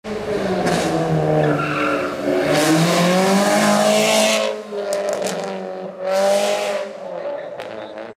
14 speeding up & down race car
moving to screech speeding car
automobile, car, race-car, screeching, tires, vroom